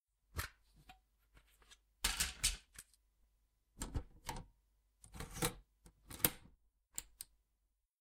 Tape Cassette Insert
A tape cassette being taken from its case and inserted into a cassette player.